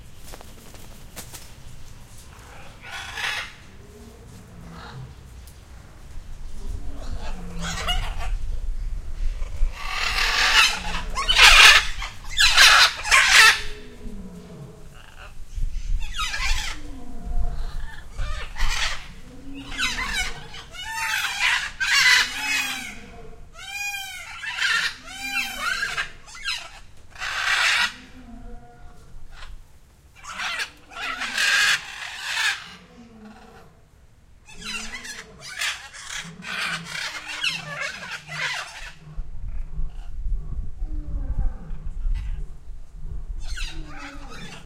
field recording of Montevideo , Uruguay , Zoo Villa Dolores , recorded with a stereo condenser mic , you can hear lions copulating in the background , while peacocks and parrots chatter and squeak.
peacocks, animals, zoo, parrots, field-recording